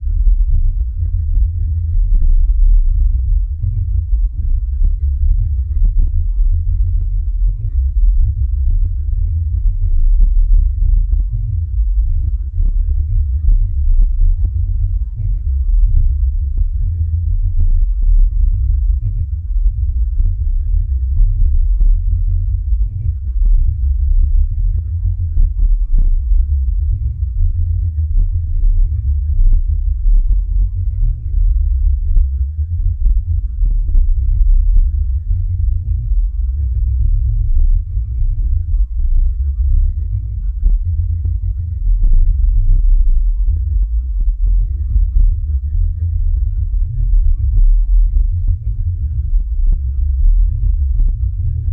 low frequency layer
Base layer for sound fx compositions for space, deep sea, volcanoes, and so on.
low-frequency, underwater, solar-system, space, volcano, sea-ground, planet